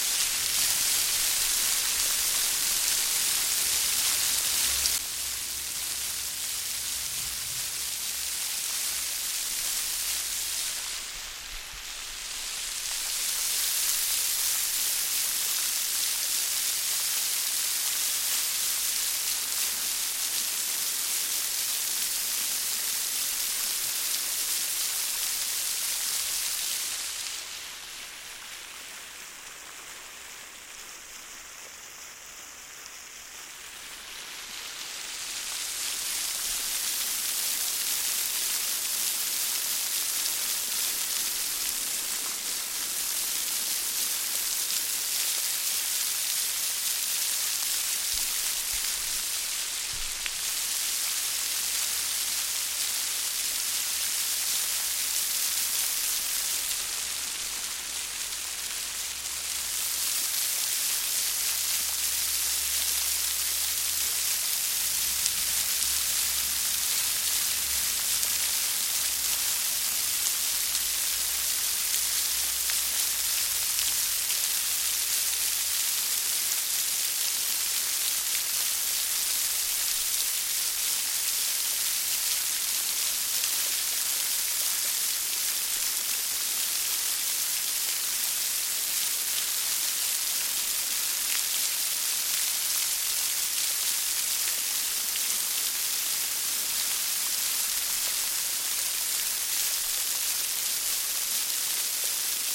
viaduct waterfall高架桥瀑布
rain, waterfall